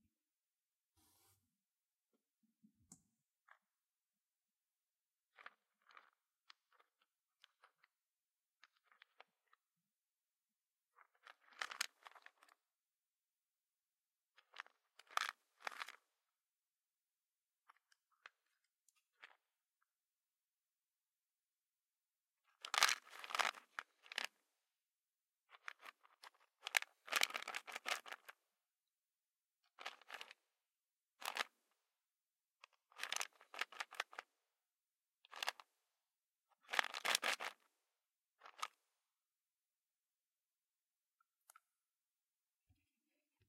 antacid bottle rattle
Antacid bottle being opened rattled and closed. recorded on Tascam using built in mics
antacid, rattle, shake, shaked